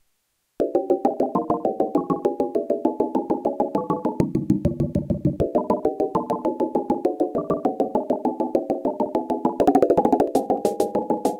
synth percussion
This is the introduction of my tune.Made with Arturia Modular V.
electronic, percussion, synthesizer